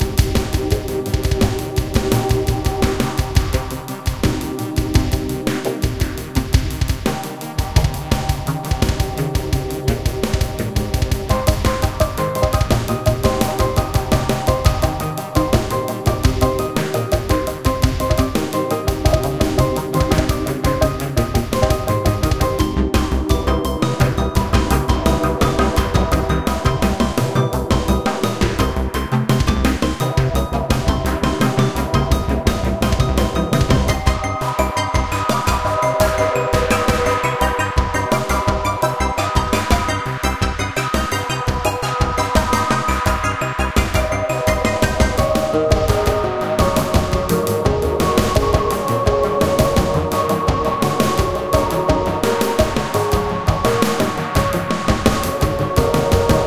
(Nov-17-2020)
Strange music fragment
Drums, synthesizers & a bass line